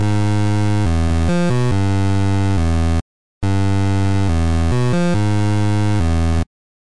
Generic "Nintendo like" melody.